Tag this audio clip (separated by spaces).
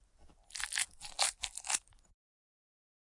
Foley Gross Slosh